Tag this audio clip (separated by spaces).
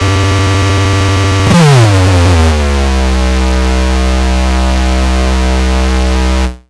antti beep bleep distortion electronic frequency-sweep korg mda monotron-duo overdrive power-down saro smartelectronix tracker